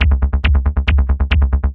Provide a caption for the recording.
TR LOOP 0304
loop psy psytrance trance
loop, psy, psytrance, trance